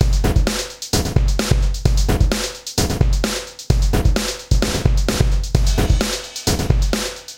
Raw Power 003
Produced for music as main beat.
industrial, raw, rock, drum